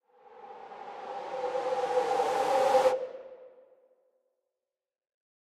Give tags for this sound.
swoosh; whoosh; swosh; swish; transition; air; soft; long; woosh

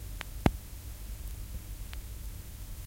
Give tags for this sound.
Crack; LP; Player; Record; Recording

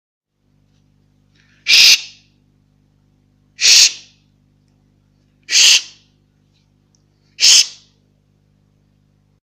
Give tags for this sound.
horror; shh; shhh; horror-fx

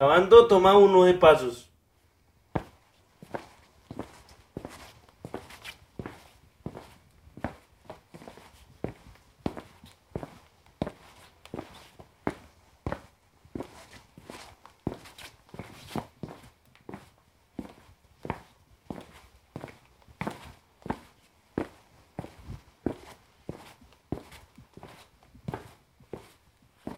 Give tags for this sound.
foot; pasos; steps; walk; walking

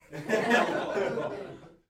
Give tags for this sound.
adults; chuckle; fun; funny; haha; laugh; laughing; laughter; theatre

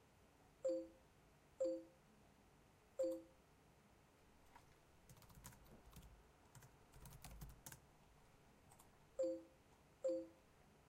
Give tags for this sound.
aip09; computer; electronic; machine

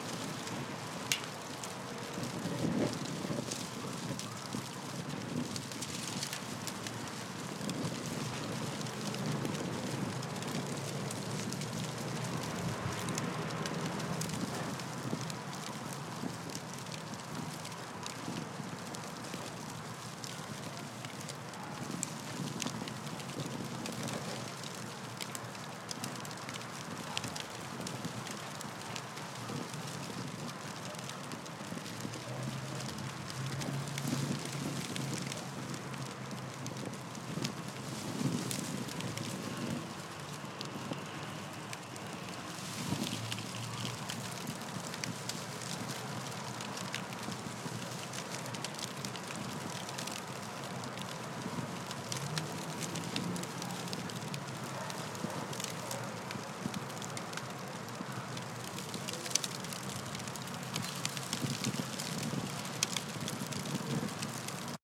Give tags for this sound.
fire; fireplace; flame; paper

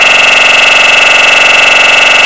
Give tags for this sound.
buzz; electronic; loop; loud; machine

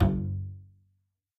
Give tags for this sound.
Acoustic; Bass; Double; Instrument; Plucked; Standup; Stereo; Upright

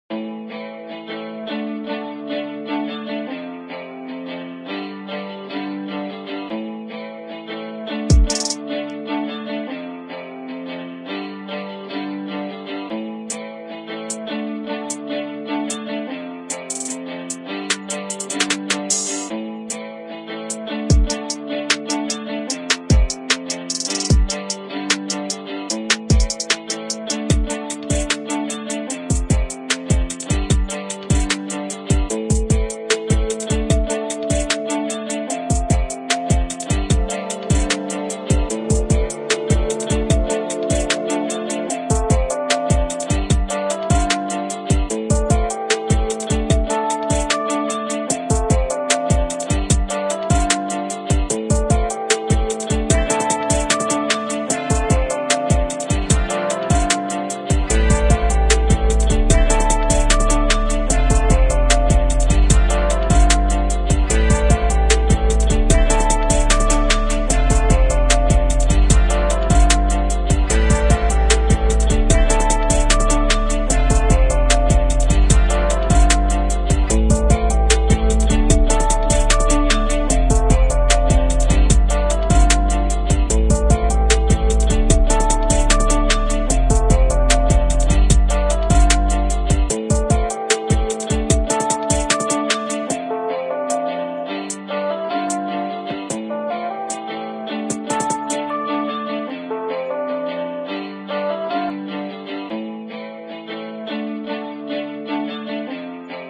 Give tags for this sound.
bass; beat; drum; drums; loop; rap; west; western

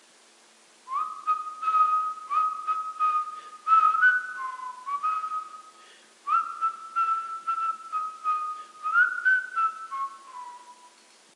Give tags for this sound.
human; whistling

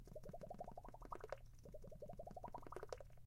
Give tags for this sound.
bubble; boiling; bubbles; laboratory

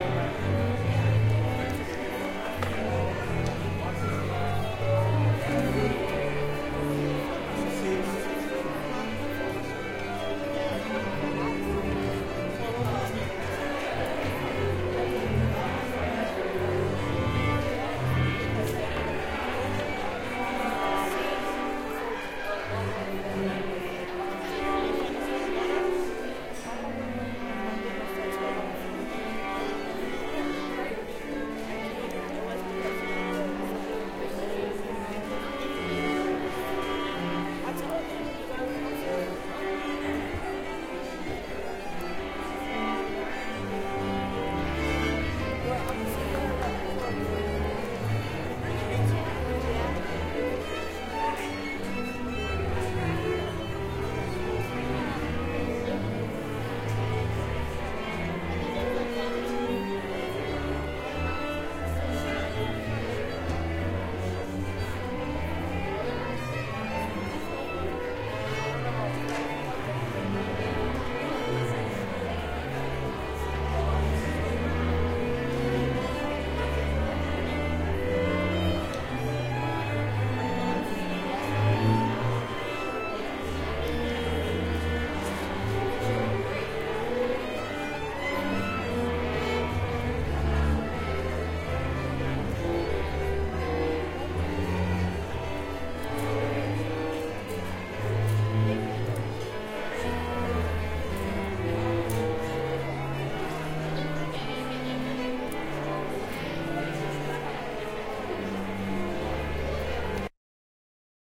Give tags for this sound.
ambiance; strings; warmup; music; field-recording

